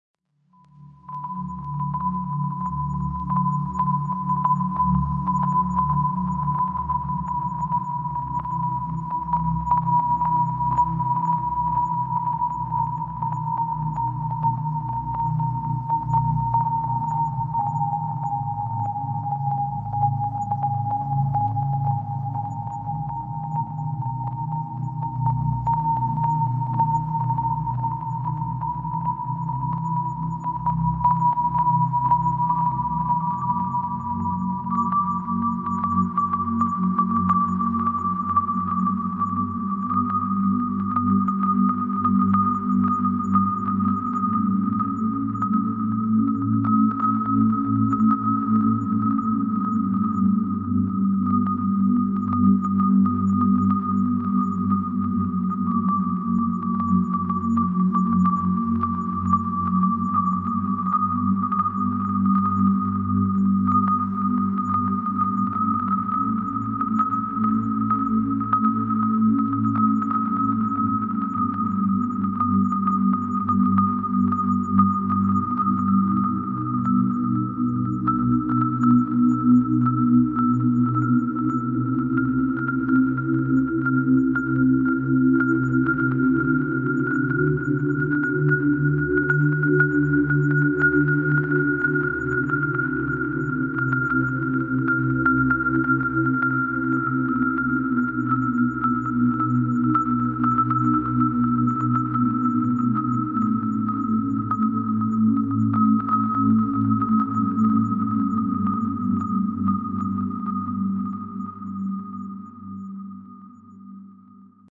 CWD LT ootal intro
ambience, ambient, atmosphere, cosmos, dark, deep, drone, epic, fx, melancholic, pad, science-fiction, sci-fi, sfx, soundscape, space